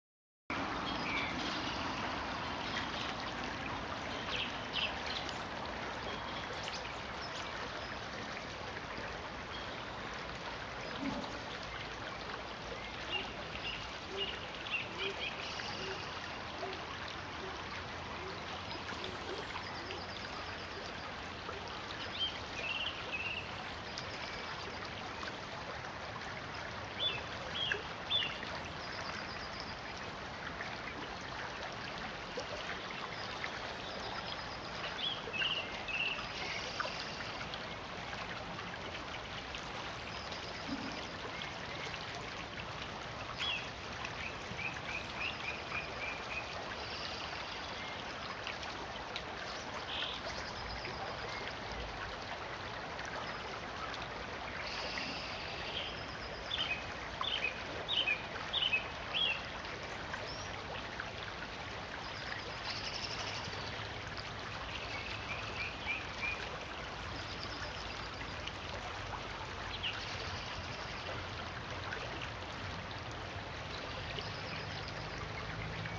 Early March, late afternoon in a quiet English country lane, a brook nearby. A song-thrush sings its first song of the year, other birds can be heard as well. Extracted from video taken with a Panasonic TZ8 camera.